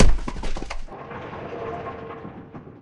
dirt collision
An impact or crash into grass bank
crash, grass, rubble